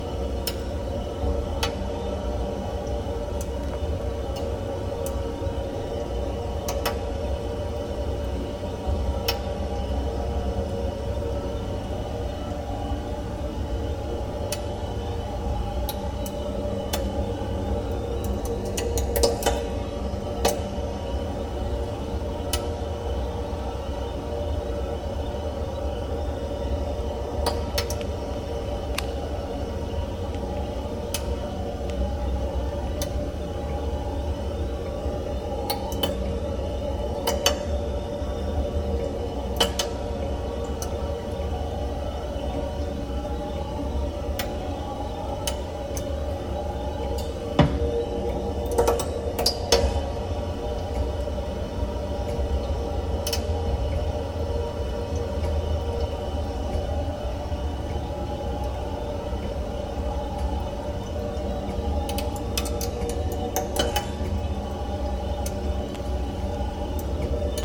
Mic pressed against a pipe from a central heating system in a large building. Flowing water heard as hum, presumably air in the system causing nice ticks heard reverberation through the entire system.Also works great with ticks edited out as eerie atmosphere.
pop, water, hum, tick, click, flowing-water, central-heating, pipe